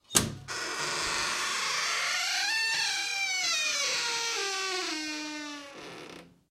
Opening Door
This sound was recorded in Laspuña (Huesca). It was recorded with a Zoom H2 recorder. The sound consists on a door mechanism that sounds while it is closing the door.
House, Open, UPF-CS12